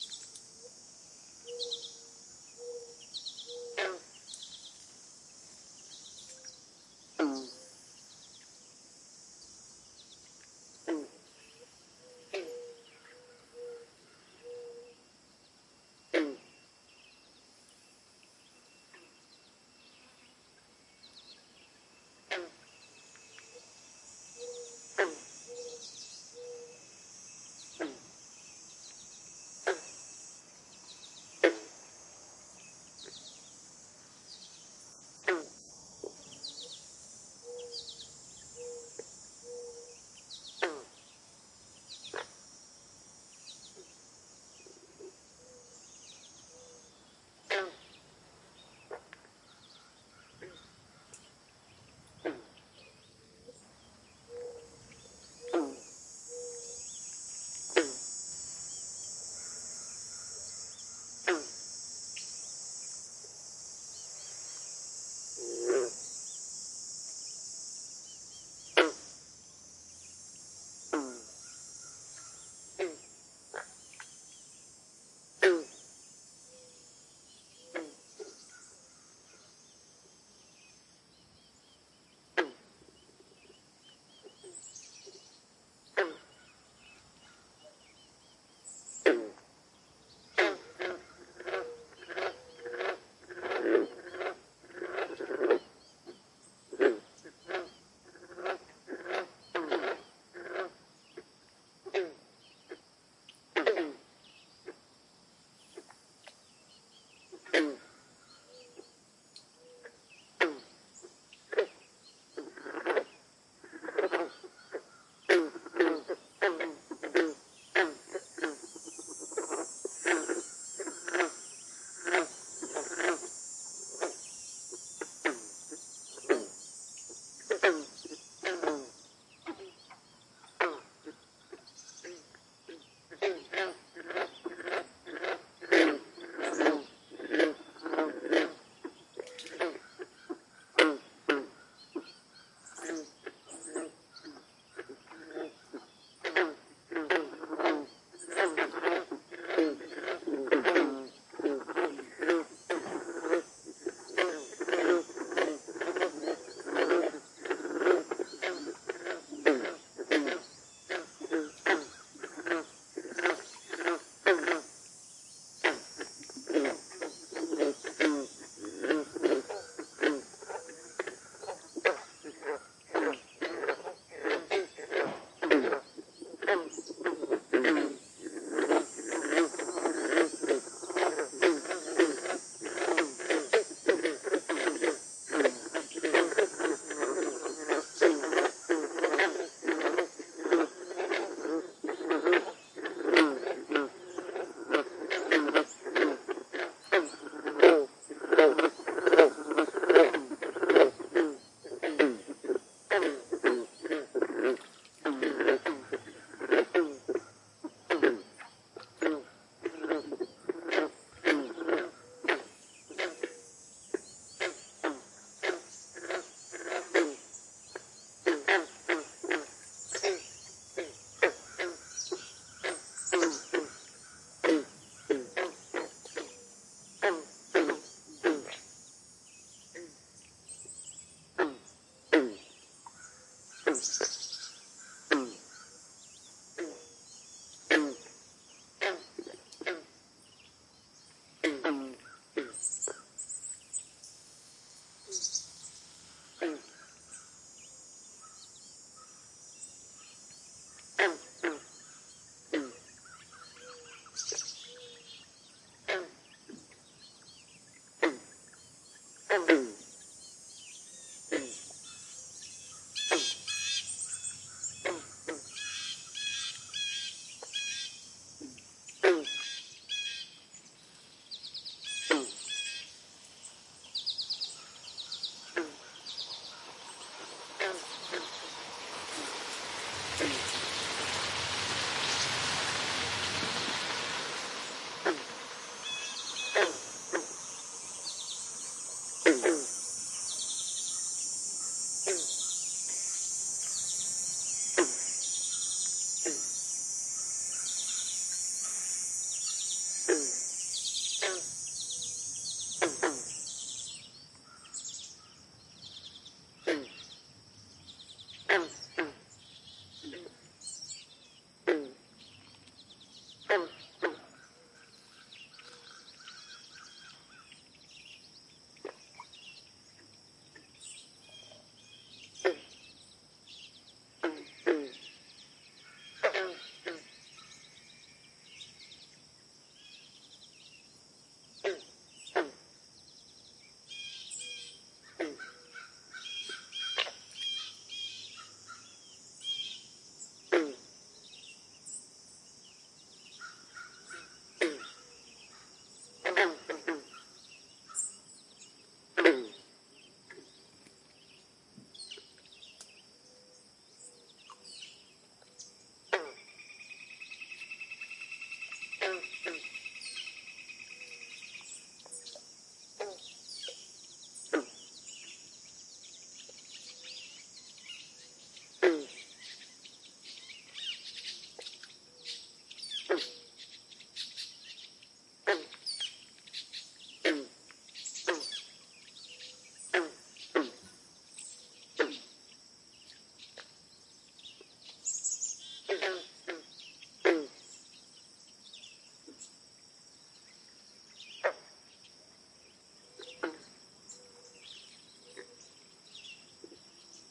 Frog Pond 1 - Harvest Moon Trail - Wolfville NS
A field-recording from the Harvest Moon Trail near Wolfville, Nova Scotia, Canada. This frog pond has loads of bullfrogs that sound like plucked, loose banjo strings. Every now and then one plops in the water and swims to a different point.
birds, frogs, field-recording, crickets, marsh, nature, cicada